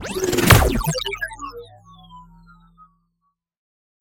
SciFi Gun - Plasma Hyper Lance 2
Hyper Lance 2
custom NI Razor patch
Gun, Plasma, Pulse, Sci-Fi, Spin